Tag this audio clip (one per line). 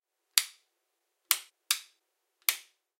click
light
short
switch